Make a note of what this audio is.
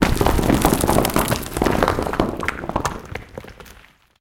Bricks/Stones/Rocks/Gravel Falling
Rocks, bricks, stones falling, rolling.
Simple mix with minimal cleanup of:
rocks__adamgryu__336023
rockfall2a__alancat__389303
falling-rock__spookymodem__202098
Variations:
break, breaking, brick, bricks, crush, crushing, dirt, dirty, drop, dropping, fall, falling, gravel, noise, noisy, rock, rocks, scatter, scattering, stone, stones